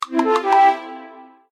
This is a short progression originally used to indicate when a player builds something cheap in an online game. Created in GarageBand and edited in Audacity.